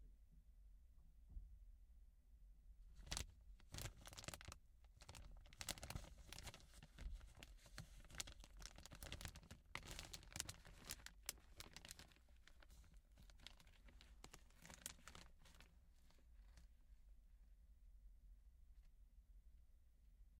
Plastic Bag Foley
The sound of someone messing with a plastic bag.
bag, plastic, rustling